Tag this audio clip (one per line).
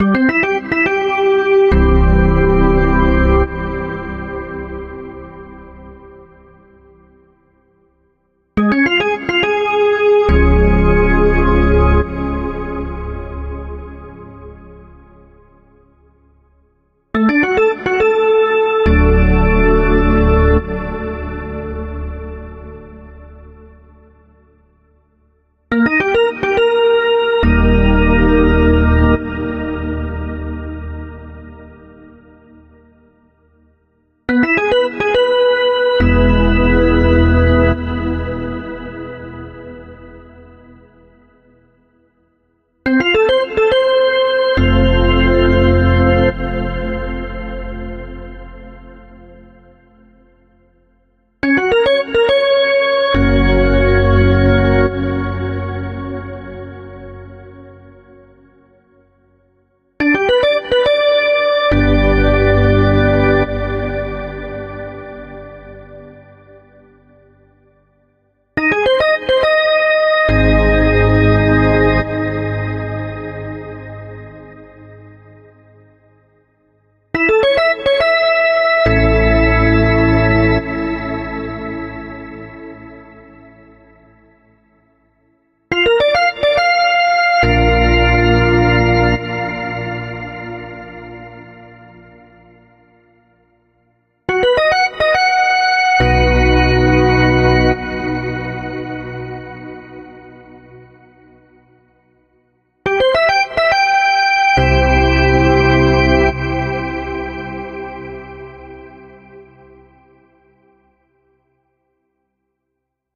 baseball
crowd
engage
football
hockey
pleaser
socker
sports
teaser